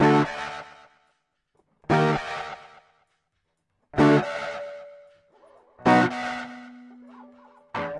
paul mid echo tube loop 1
Cool echo guitar sounds
tube, echo